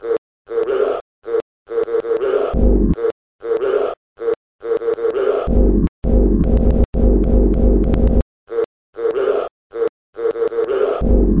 A mix of a couple of sounds I found here. Gorilla by puniho and growl in growl pseudostereo by timbre
g, g-g-gorilla, g-gorilla, gorilla, growl